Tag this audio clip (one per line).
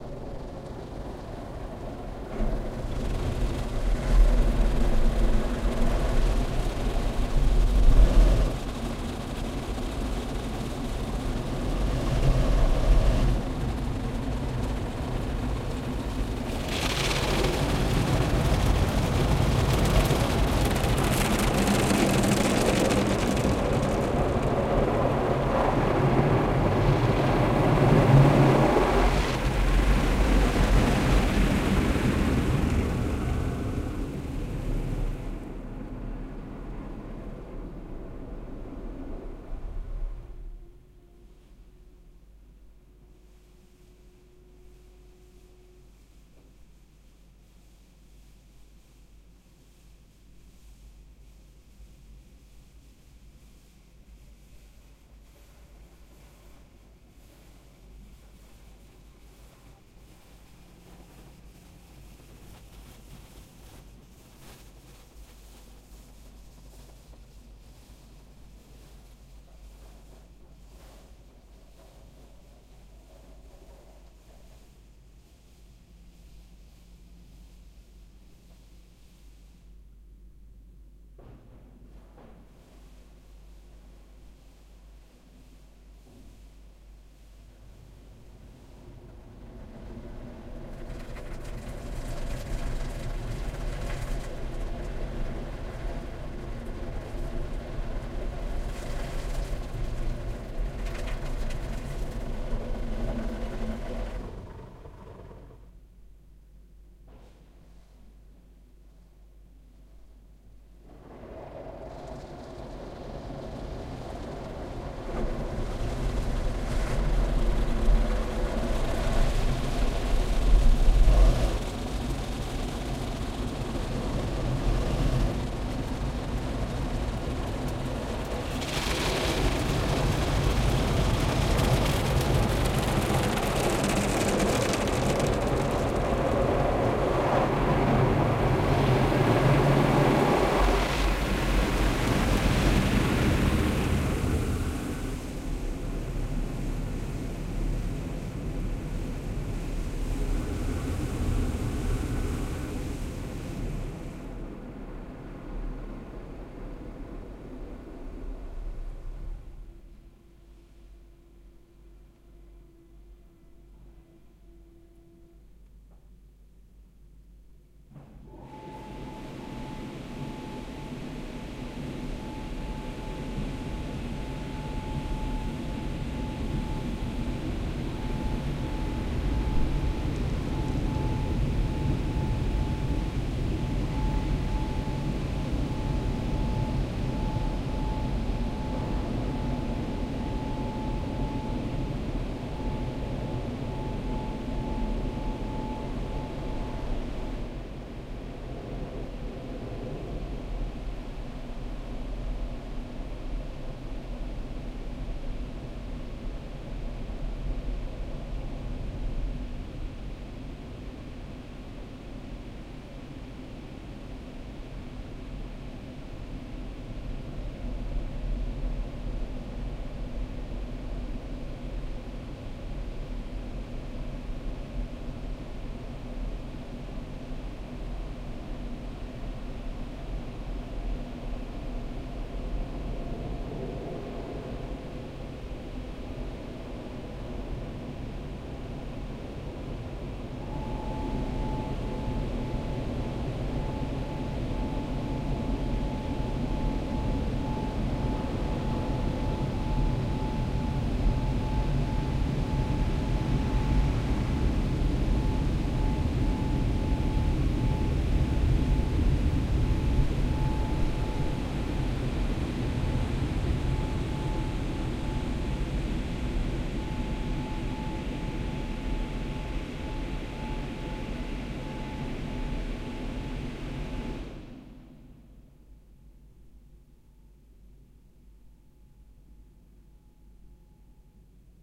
cleaning
clean
Car
washing
wash